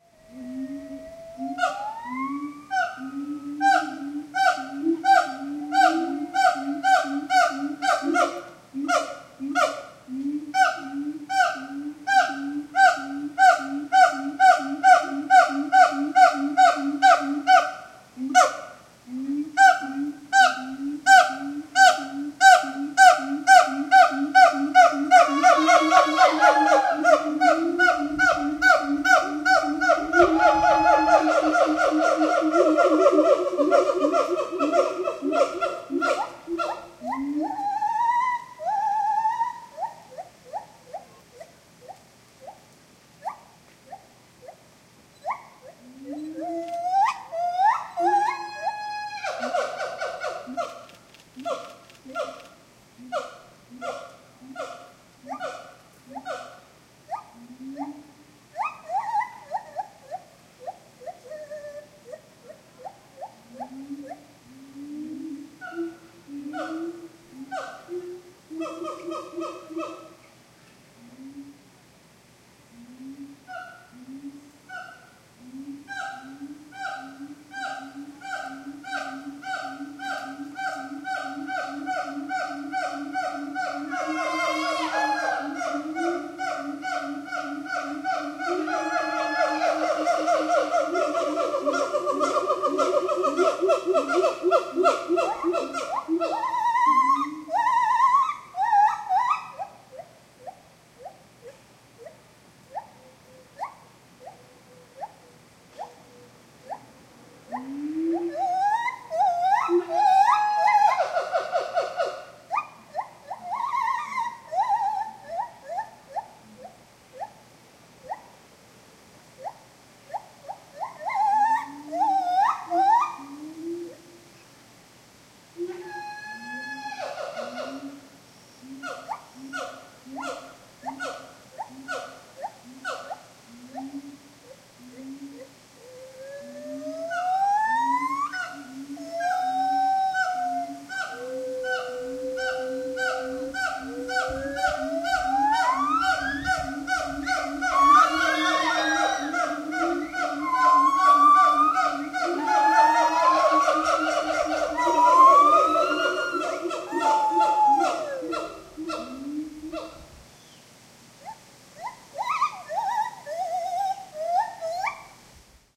Groups of Siamangs and Lar Gibbons calling to each other from separate islands, with a waterfall in the background. This was recorded closer to the island where the Lar Gibbons were. Recorded with a Zoom H2.
primates, island, waterfall, asia, ape, monkey, siamang, zoo, tropical, gibbon, rainforest, field-recording, jungle, exotic